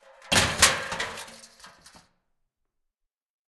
COKE CANS DISPOSAL C617 016
There were about twenty coke cans, four plastic cups, a garbage pail and an empty Culligan water bottle. These were arranged in various configurations and then kicked, dropped, smashed, crushed or otherwise mutilated. The sources were recorded with four Josephson microphones — two C42s and two C617s — directly to Pro Tools through NPNG preamps. Final edits were performed in Cool Edit Pro. The C42s are directional and these recordings have been left 'as is'. However most of the omnidirectional C617 tracks have been slowed down to half speed to give a much bigger sound. Recorded by Zach Greenhorn and Reid Andreae at Pulsworks Audio Arts.
bin, bottle, c42, c617, can, chaos, coke, container, crash, crush, cup, destroy, destruction, dispose, drop, empty, garbage, half, hit, impact, josephson, metal, metallic, npng, pail, plastic, rubbish, smash, speed, thud